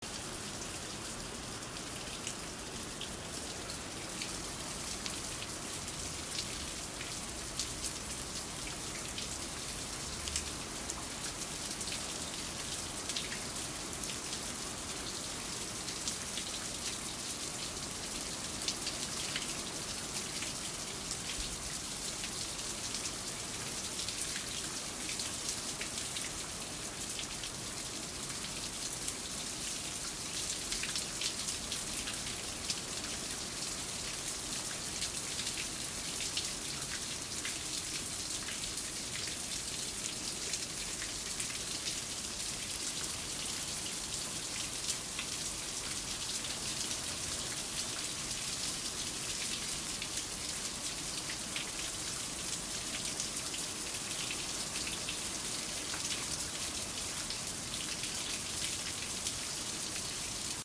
One minute of rain.
weather, raining